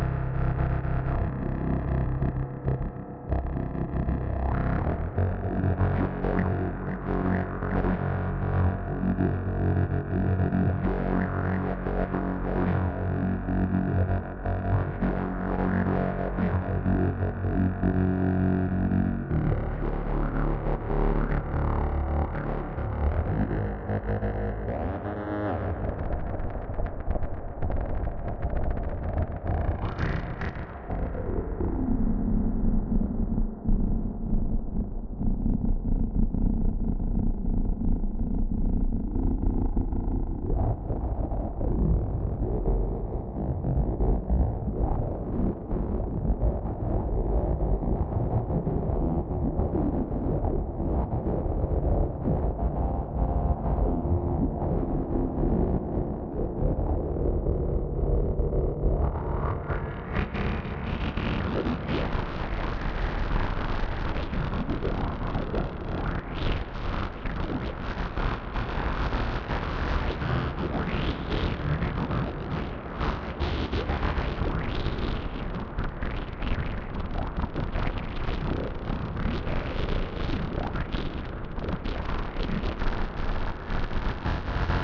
a buzzing bass sound processed like a loop at 120 bpm on Reaktor.